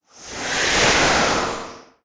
A loud whoosh sound. Recorded with a CA desktop microphone.
loud, spaceship, whoosh, noise, sci-fi